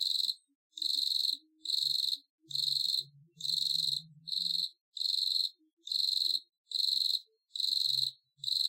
Loop of a cicada, recorded in Australia.
Have a sound request?